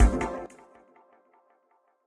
this is a new series these are made from sampling my acoustic guitar and processing everything in renoise multiple resampling and layerings